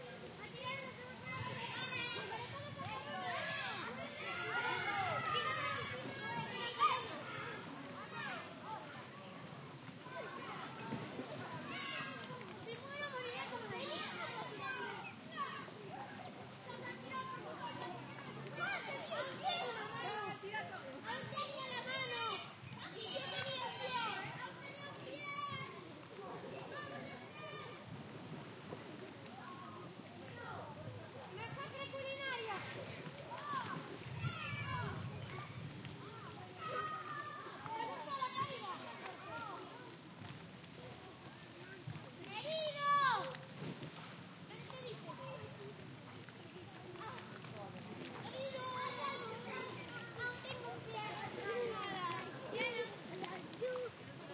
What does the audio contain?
ambience on a swimming pool with childs
h4n X/Y
swimming, pool